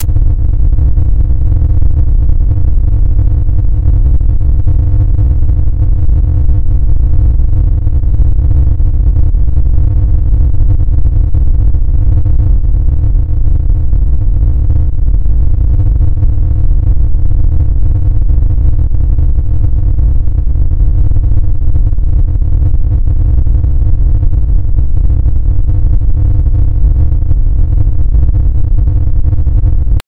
11 LFNoise1 200Hz
This kind of noise generates linearly interpolated random values at a certain frequency. In this example the frequency is 200Hz.The algorithm for this noise was created two years ago by myself in C++, as an imitation of noise generators in SuperCollider 2.
ramp, frequency, noise, linear, low, interpolation